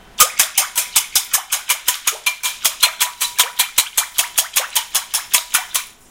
Various sounds from around my kitchen this one being the microwave being a hand whisk
kitchen, wisk